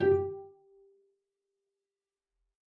One-shot from Versilian Studios Chamber Orchestra 2: Community Edition sampling project.
Instrument family: Strings
Instrument: Cello Section
Articulation: tight pizzicato
Note: F#4
Midi note: 67
Midi velocity (center): 31
Microphone: 2x Rode NT1-A spaced pair, 1 Royer R-101.
Performer: Cristobal Cruz-Garcia, Addy Harris, Parker Ousley
cello, cello-section, fsharp4, midi-note-67, midi-velocity-31, multisample, single-note, strings, tight-pizzicato, vsco-2